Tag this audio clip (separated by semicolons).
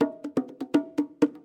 bongo; drum